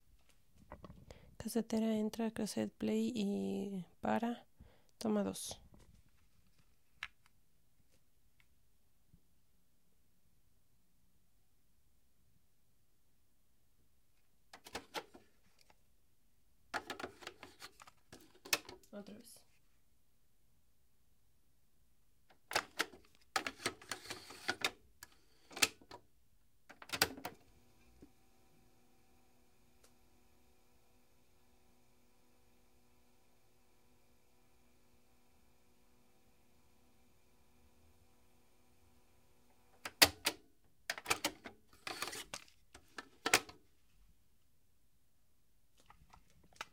Cassette tape player 1 cassetera
Cassette player foley, buttons and playback. Recorded with DR40 and RODE NTG1 from a 2000s era AIWA portable stereo.
sfx, tape, foley, cassette, player